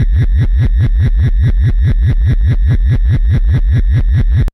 quantum radio snap006
Experimental QM synthesis resulting sound.
drone, noise